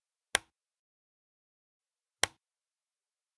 A desk light switch, being switched on and off.